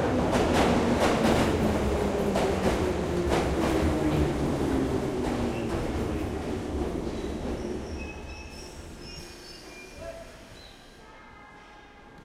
London underground 07 train arriving
Train coming to a halt in a London Underground station.